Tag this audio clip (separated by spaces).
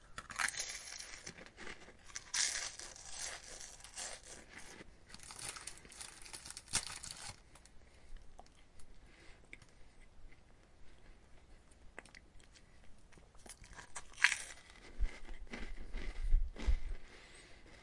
crackers crunching crunchy eating eating-crackers munch munching